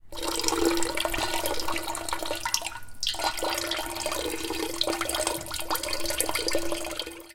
Pouring water in a metal cooking pot.
Recorded with Tascam DR-40X.